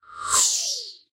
Magical Whoosh
Throwing an energy ball. Made with Hokusai 2.0 v2.2.6 on iPad Pro 2021 (11-inch) (3rd generation)
energy, fireball, magic, magical, spell, Whoosh